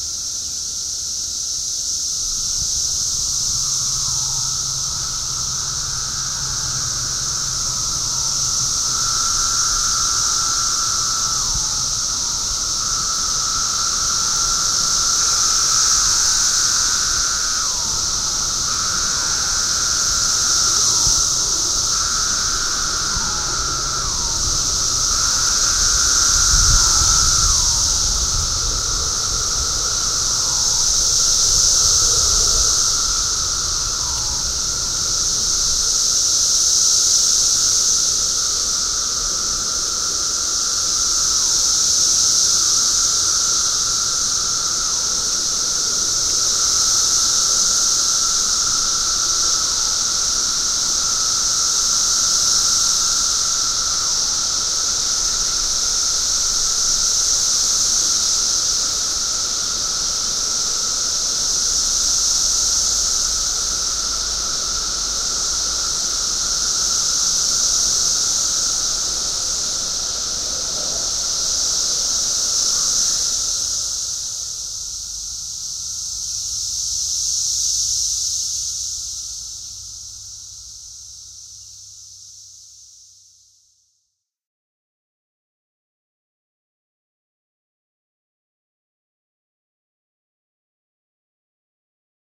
i recorded cicadas with shure sm58 to digital recorder
insect, cicada